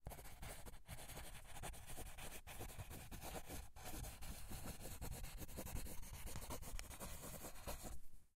writing - pencil - wide 02
Writing with a pencil across the stereo field, from right to left.
Recorded with a Tascam DR-40, in the A-B microphone position.
paper, pencil, right-to-left, rustle, scratch, scratching, stereo, writing